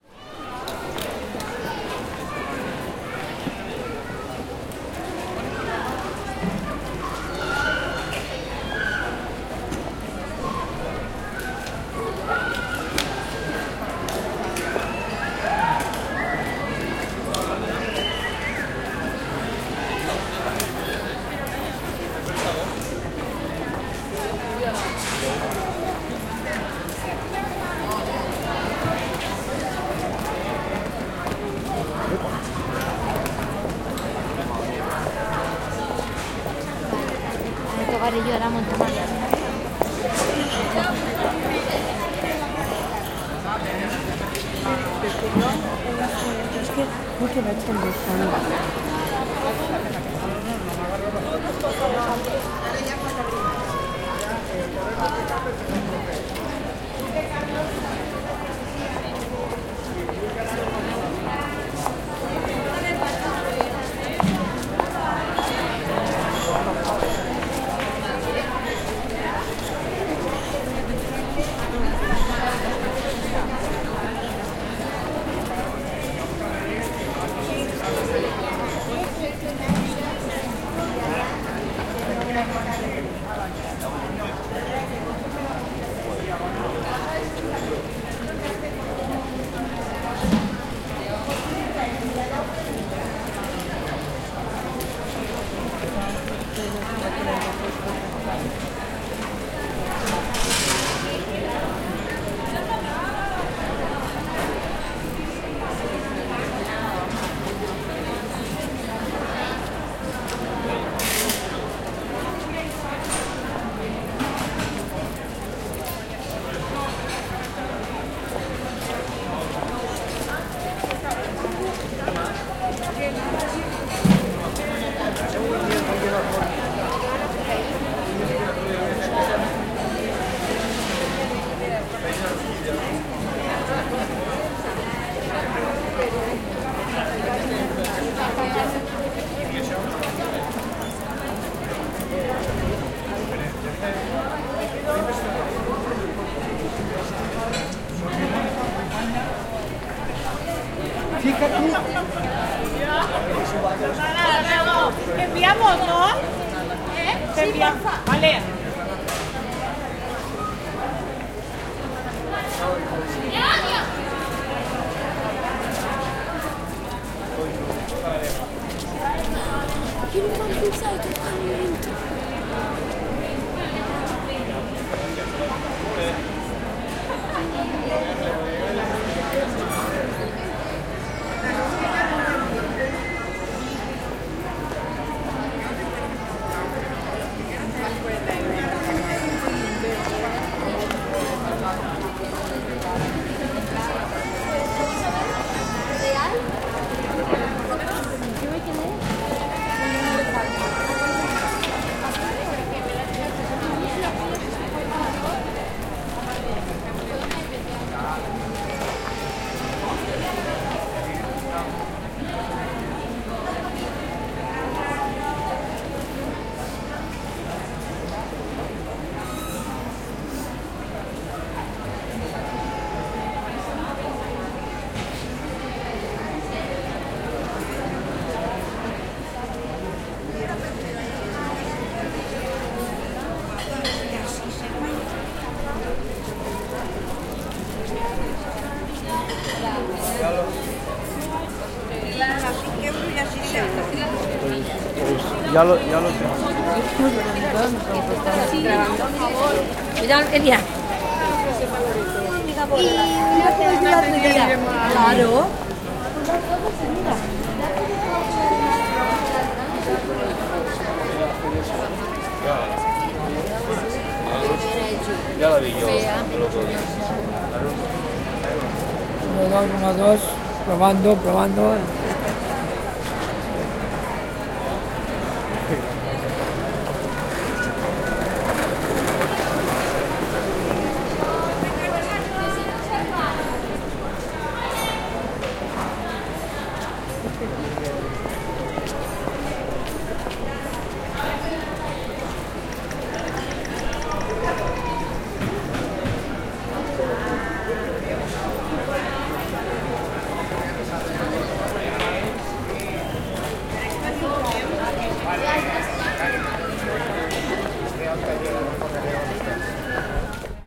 High Street of Gandia (Valencia, Spain)
Soundscape recording made from the Gandia high street (Valencia, Spain) in the afternoon. There is a constant change with the moving sounds of people who walk and talk around.
Recorded with Zoom H4n about 19h00 on 12-11-2014
walking, children, people, street, stores